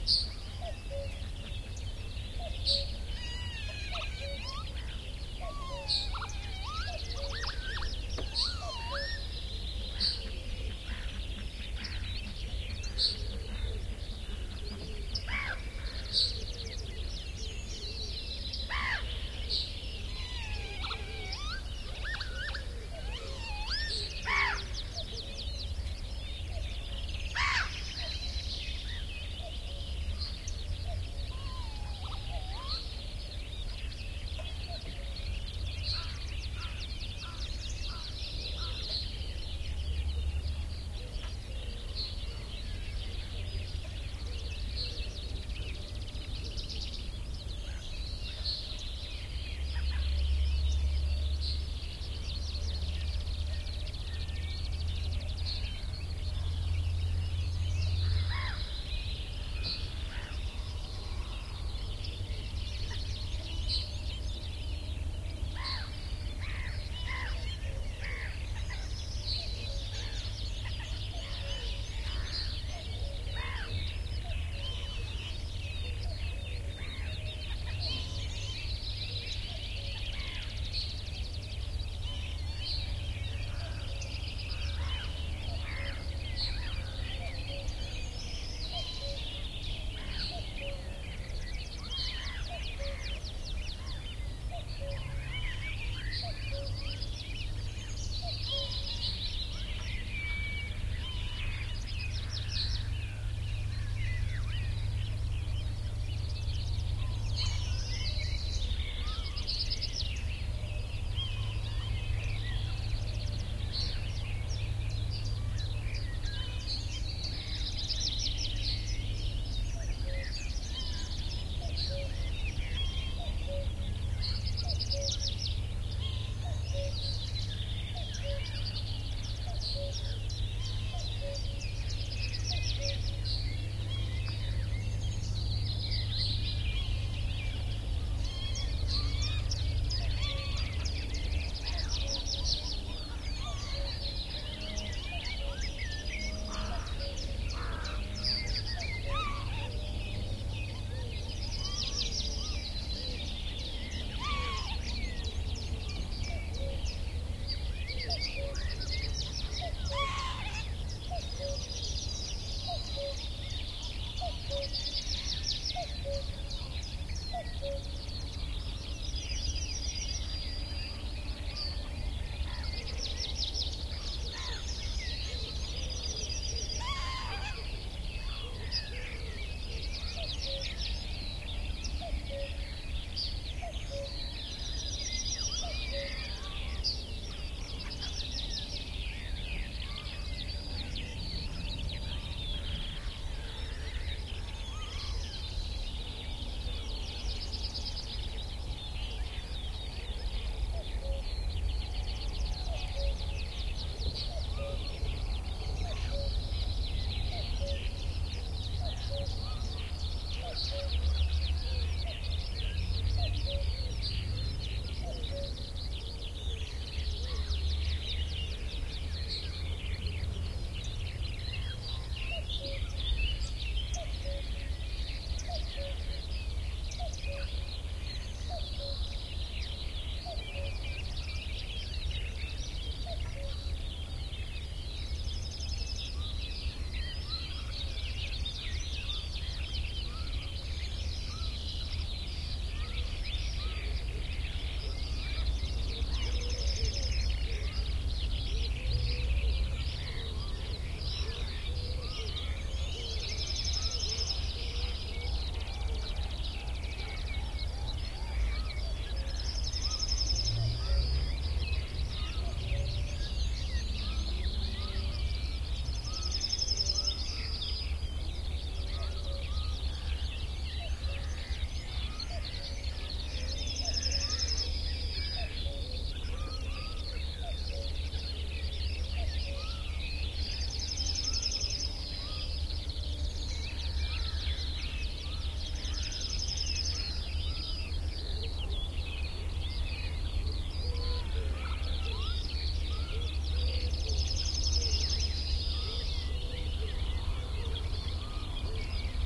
Field Marbaek
The area of Marbaek is situated s.th. like 10 miles north of Esbjerg on the westcoast of Denmark. There is a brilliant beach there, forests, heathland and some fields. On the later I did this recording, using 2 Sennheiser MKH40 microphones into an Oade FR2-le recorder early one morning in May 2010.
field-recording, denmark, spring, field